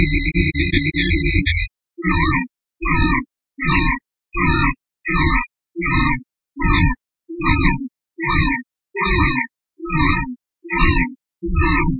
[AudioPaint] ghost letters
Another sound generated with AudioPaint from an image! This one was some letters.
audiopaint, image-to-sound, spooky, squeaking, whining